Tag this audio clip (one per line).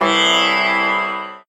mono
sitar
india